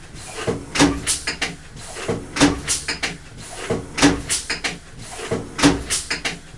Recording of a 1970's model of a foil ribbon machine, used to create 1st, 2nd, 3rd, place ribbons, etc... Nice untouched mechanized, industrial sound
air, bang, continuum-4, industrial, mechanical, metal, sound-museum, steam